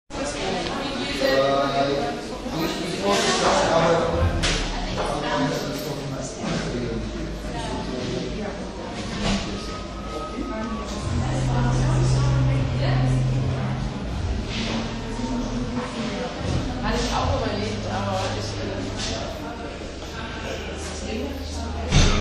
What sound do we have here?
small talk and noise
Small talk at Funkhaus, during Ableton Loop2017 Music Summit
funkhaus, music-summit, crowd, ableton, loop2017, noise, berlin